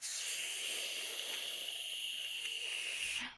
slurp hiss thing
making weird sounds while waiting for something to load
slurp, vocal, hiss, sound